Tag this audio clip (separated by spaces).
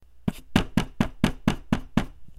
knocking knuckle-rap knock door